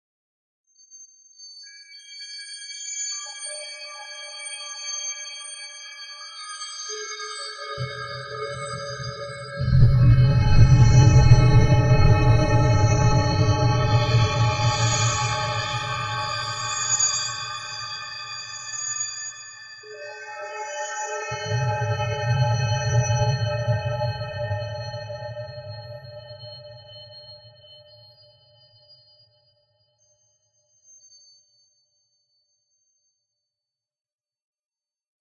FlutesoftheNetherland 001 hearted
creepy, dark, eerie, mysterious, ominous, scary